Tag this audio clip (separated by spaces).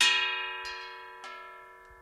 ding hit metal ring ting tone